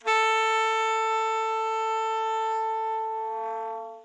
Alto Sax A4
The A4 note played on an alto sax
alto-sax, instrument, jazz, music, sampled-instruments, sax, saxophone, woodwind